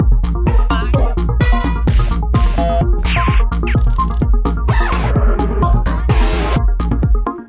Glue/Snap (128 bpm)
Tech snippets, snapshots, glue.
tech-house, house, snapshot, minimal-techno, missing-context